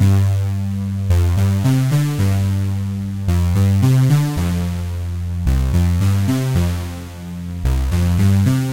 Part of the Beta loopset, a set of complementary synth loops. It is:
* In the key of G mixolydian, following the chord progression G7sus4 Fsus2.
110bpm, synth